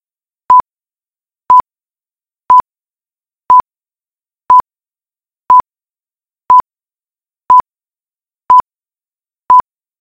Sound before old film.
Edited with Audacity.